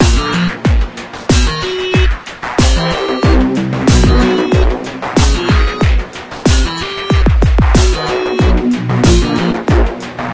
strong rock
disco loop rock
rock, disco